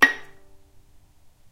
violin pizzicato vibrato